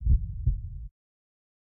A heartbeat recorded from the Korg M1 VSTI processed with a compressor from the Korg MDE-X multi effect VSTE.
beat, body, chest, heart, heartbeat, human